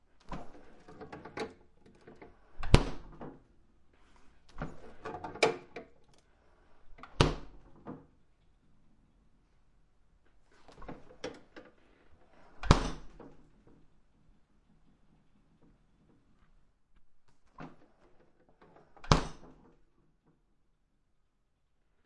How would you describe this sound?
fridge freezer door open close slight rattle
close, door, freezer, fridge, open, rattle